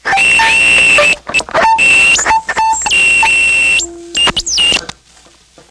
About 10 years ago my friend gave me a guitar he found in the garbage. This is one of the horrible and interesting sounds it would make. These sounds were recorded originally onto a cassette tape via my Tascam Porta07 4-track. This sound is also similar to numbers 5 and 6, but more "broken" sounding.
guitar, harsh, feedback, broken, distortion